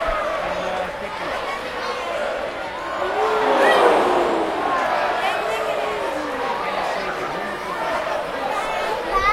Soccer stadium Oehh
Field recording of a Dutch soccer match at the Cambuur Stadium in Leeuwarden Netherlands.